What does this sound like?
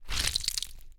rubber anti stress ball being squished
recorded with Rode NT1a and Sound Devices MixPre6
slime, horror, flesh, horror-effects, squelch, horror-fx, zombie, brain, squish, mush, gross, blood, gore